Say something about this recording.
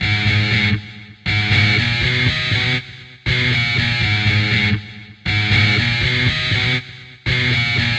Short loopable crunchy guitar riff, created in Samplitude, alas I have no real guitar!
guitar, power, heavy-guitar, power-guitar, power-chords, heavy